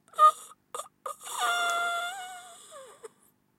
Good quality zombie's sound.